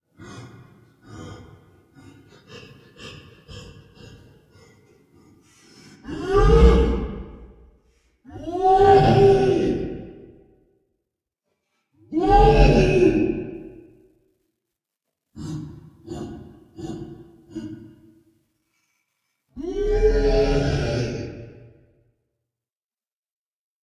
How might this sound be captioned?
FP Monster
Breathing, grunting, roaring of a monster. Voice through long plastic pipe.
cartoon, roars